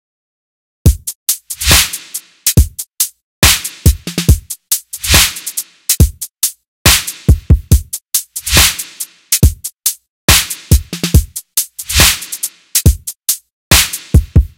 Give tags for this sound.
140; beat; bpm; drum; Dubstep; free; good; loop; mastering; mix; quality

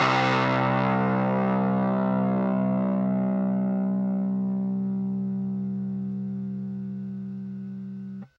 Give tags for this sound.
amp; chords; distortion; guitar; miniamp; power-chords

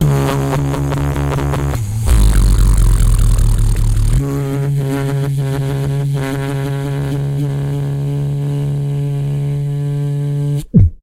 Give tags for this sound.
Bass; Beatbox

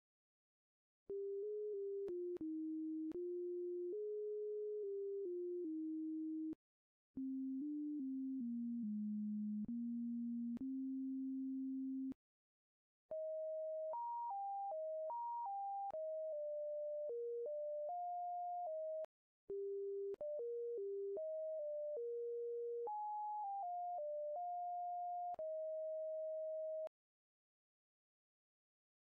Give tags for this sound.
vintage; melody; synth; sequence

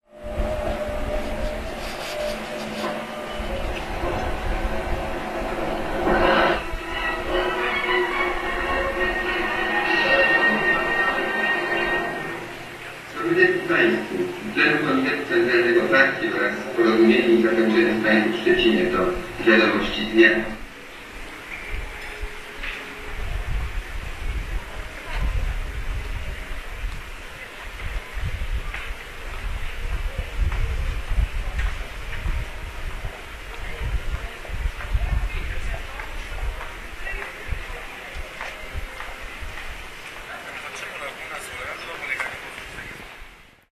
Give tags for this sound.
field-recording; solidarnosc; wind

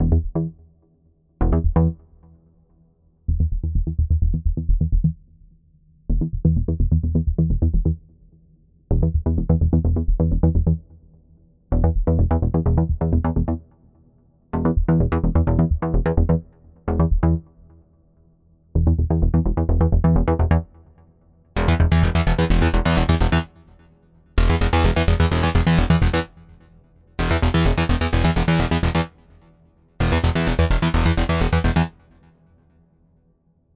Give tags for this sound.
arp; arpeggiator; arpeggio; computer; cool; cpu; error; flash; melodies; menu; message; option; signal; success; synth; warning

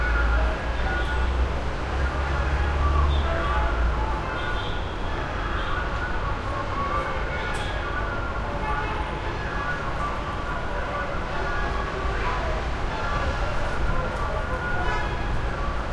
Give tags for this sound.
East; heavy; Middle; skyline; traffic; city

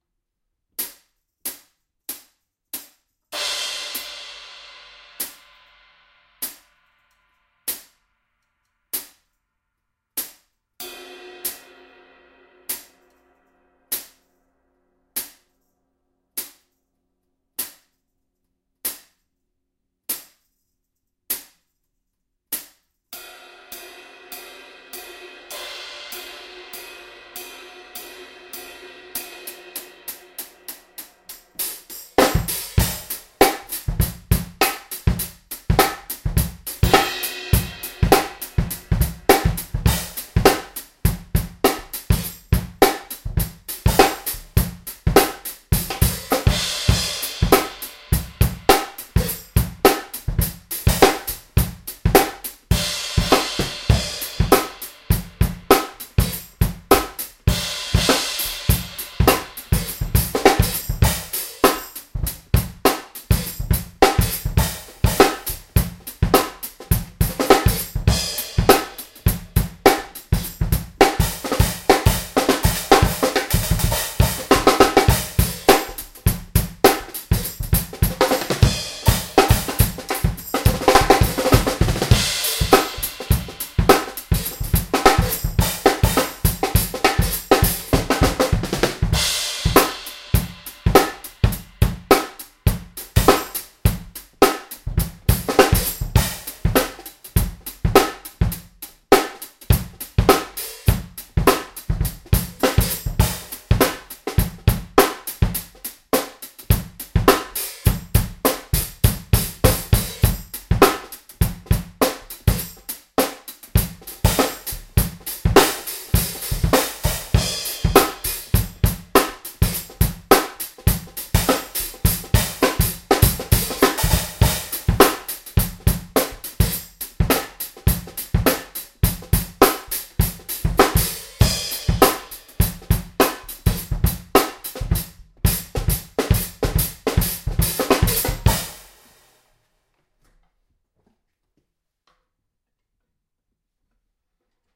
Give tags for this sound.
beat; crash; dilla; drums; high; hihat; hip; hop; j; kick; kit; snare; stakes

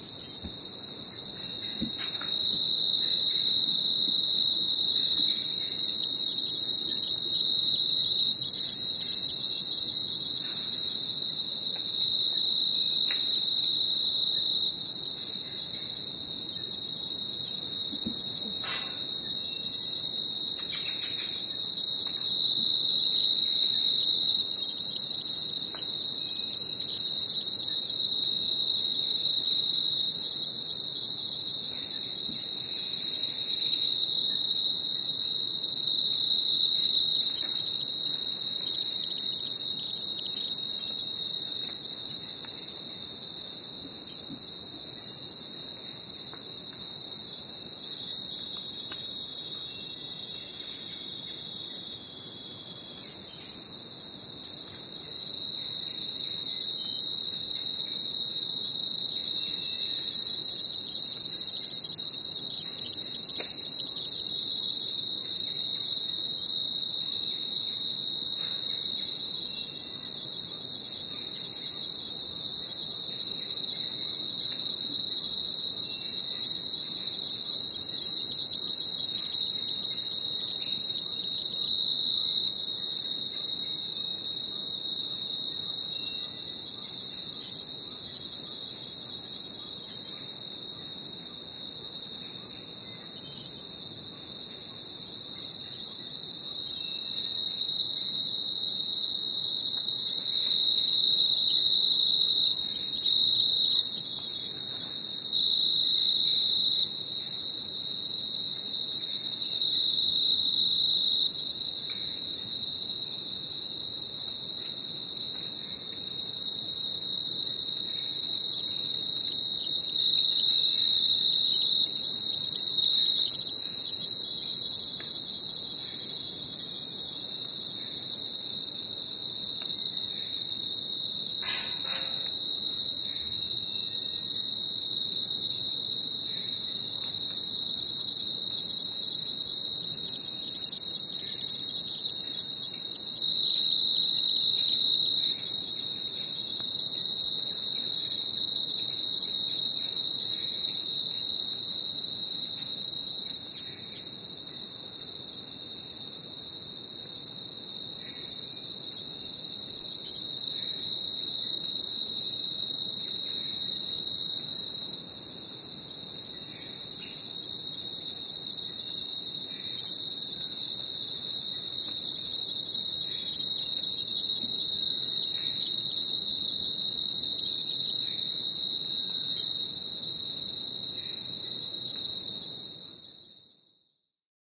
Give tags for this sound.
ambient; animals; birds; birdsong; costa-rica; field-recording; forest; nature; outside; tropical; wind

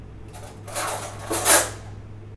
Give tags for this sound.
spoon; knife; cutrlery; kitchen; drawer

